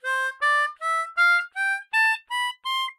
This is a C scale on an M. Hohner Special 20. Ascending only.